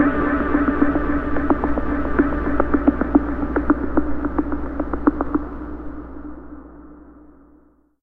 hf-7302 110bpm Tranceform!
A techno interlude @110bpm. 8 seconds. Made with TS-404. Thanks to HardPCM for the find, this is a very useful loop tool!
acid, acid-bass, loop, electronica, techno, 110bpm, ts-404, electro, bass, dance, interlude